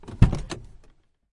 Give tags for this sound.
inside
open
stereo
volvo
door
car
interior

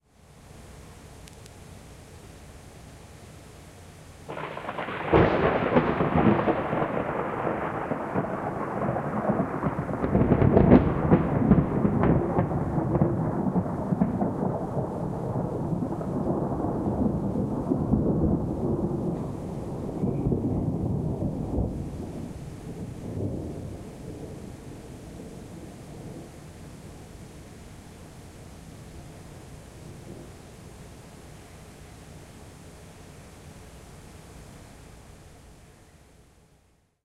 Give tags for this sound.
field-recording
soundeffect
thunder
thunderstorm

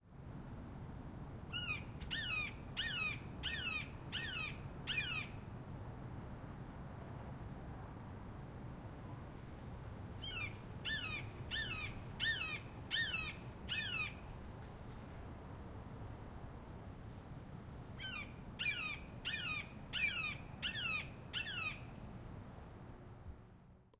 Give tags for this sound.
raptor
field-recording
coopers-hawk
nature
hawk
bird-call
bird-screech
bird
birds
aviary

